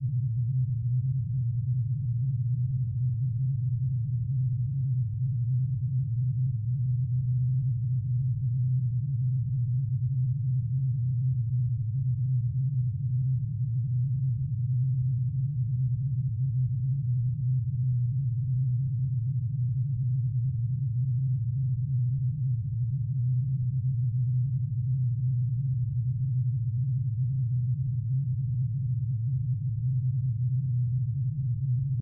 Lower Pitched Windy Drone
A dramatic, windy, lower-pitched synth drone.
drone, low-pitched, synth, windy